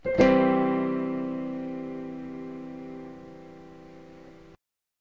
A file I made by recording myself playing two chords on a piano. Great for replacing Window's sounds.
system-sounds, ta-da, piano